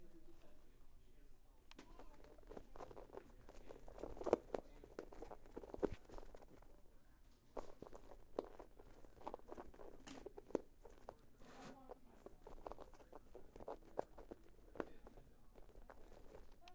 one in a series of recordings taken at a toy store in palo alto.